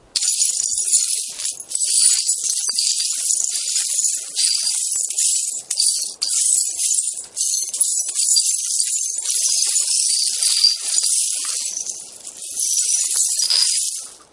Shuffling Glass Around
The quality sounds awful and I'm not sure how it turned out that way, but if you still think it's usable, the sound is here. :-D Recorded with a black Sony IC voice recorder.